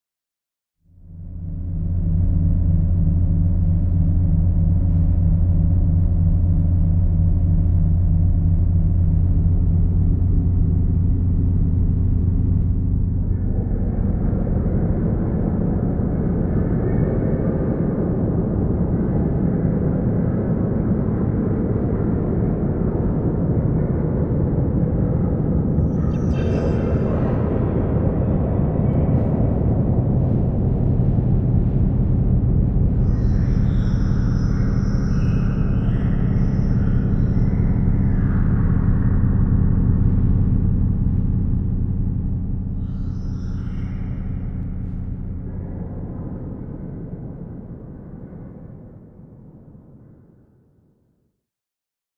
deep cavern
A dark reverb laden sound like the depths of a cave with strange inhuman voices in the background. Part of my Strange and Sci-fi 2 pack which aims to provide sounds for use as backgrounds to music, film, animation, or even games.
ambience, atmosphere, cinematic, dark, electro, electronic, music, noise, processed, sci-fi, synth, voice